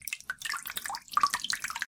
Multiple Drips 001
blop, pour, Game, Water, pouring, Dripping, wave, Sea, aquatic, Drip, aqua, Running, Wet, Lake, crash, Movie, Slap, Run, marine, bloop, Splash, River